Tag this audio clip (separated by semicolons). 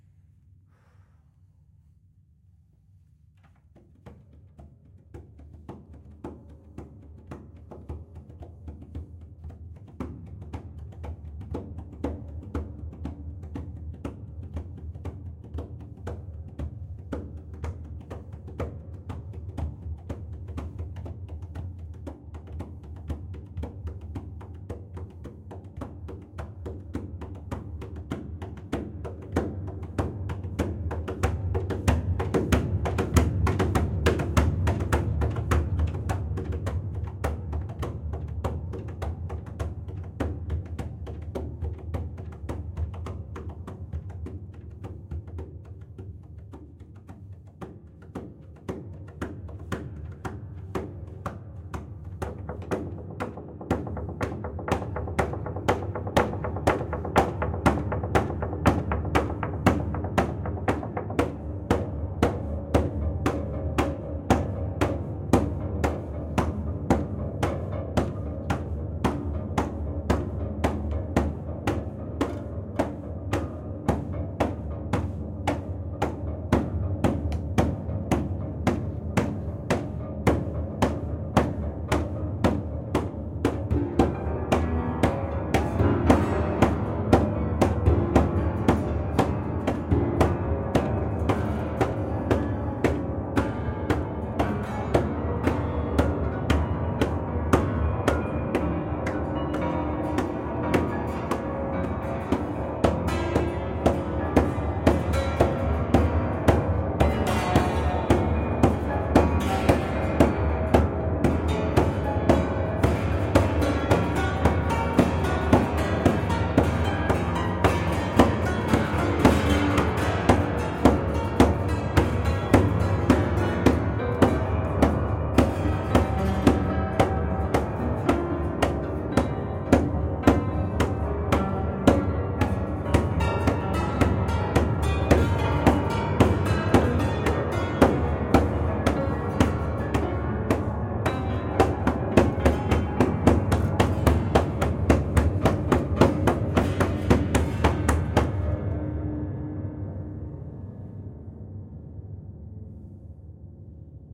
piano; fx; sound; acoustic; sound-effect; effect; soundboard; industrial; horror